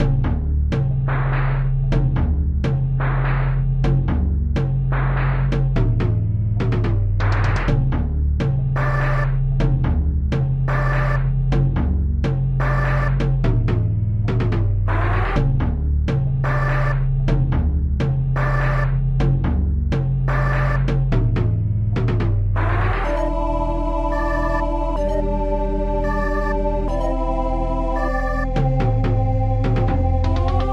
This is part of the Electro Experimental. One for the Boominator. This was created from scratch by myself using openMPT software and a big thanks to their team.
- recorded and developed August 2016. I hope you enjoy.
ambient, bass, beat, Bling-Thing, blippy, bounce, club, dance, drum, drum-bass, dub, dub-step, effect, electro, electronic, experimental, game, game-tune, gaming, glitch-hop, hypo, intro, loop, loopmusic, rave, synth, techno, trance, waawaa